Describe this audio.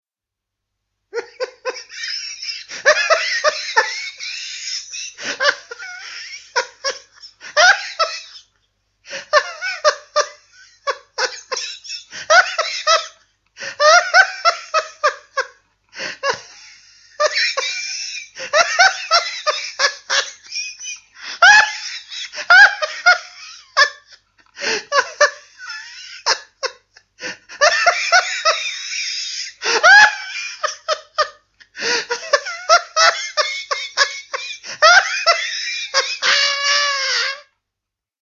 hard or stupid laughing but real
laugh, laughing, laughter